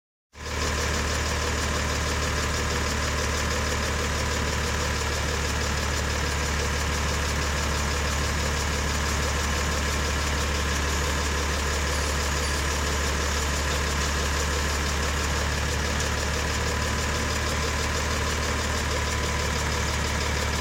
An MB trac 1400 turbo running idle on a farm in Northern Germany.
1400, motor, engine, tractor, idle, trac, running